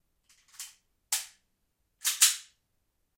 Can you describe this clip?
Gun reload ambient 1
Simple reloading of a m9. Stereo and pretty roomy sound. Recorded with 2 rode condenser microphones.
surround, ambient, slide, 9mm, sound, fx, reload, gun, weapon, pistol